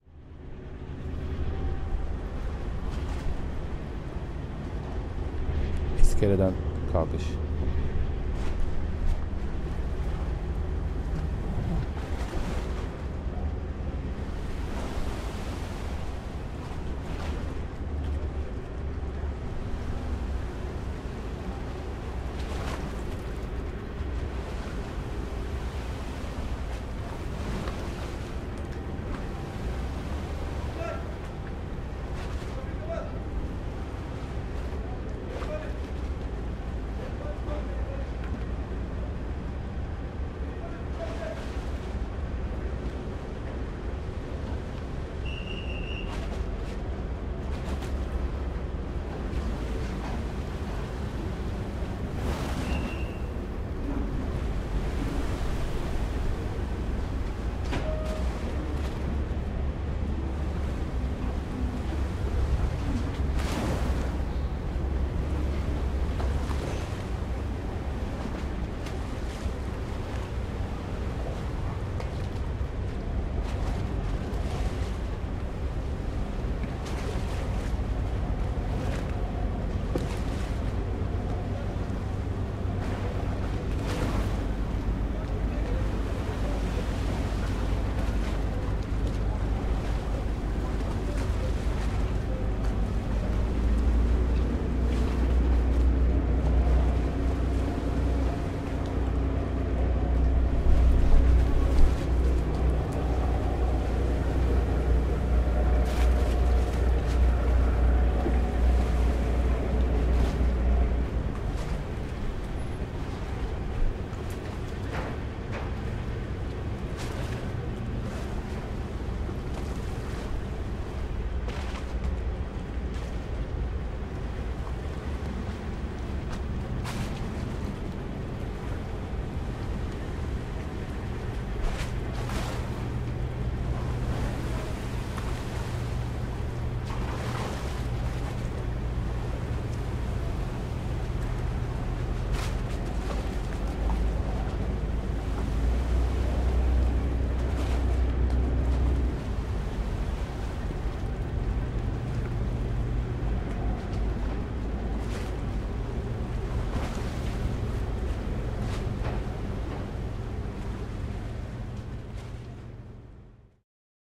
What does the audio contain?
Karakoy Neighborhood in Istanbul
Karakoy sounds by the seaside near dock stations, Istanbul
bosphorous, istanbul, karakoy, people, seaside, street